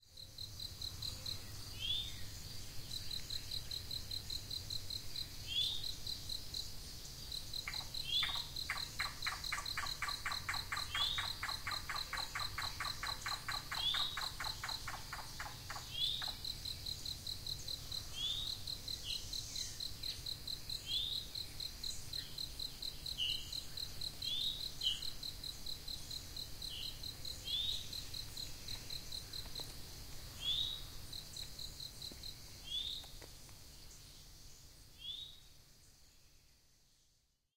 CuckooinWoodsMay23rd2015
The very unique call of the Cuckoo recording on May 23rd, 2015 in southern Illinois. This "singing" sounds like nothing else in the forest. They are not very common in this part of the country, so, I am very very fortunate to have captured this primal call on " tape ".
Equipment used: Marantz PMD661, with Stereo Samson CO-2 microphones mounted on a tripod about 4 feet from the ground. I was set up on a trail on the edge of a large forested area which eventually leads down to a huge lake. Recorded around 6:30PM on a mild evening--partly cloudy and temp was 74 degrees.